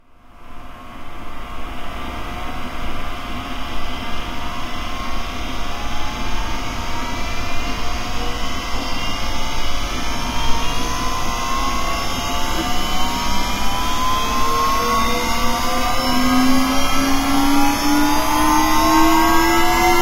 A power up sound for any sort of mechanical, high tech, or sci-fi charging up sound. Can also work for turning on jets and turbofans.
This was recorded by a Tascam DR-05 and is a printer cooling down reversed.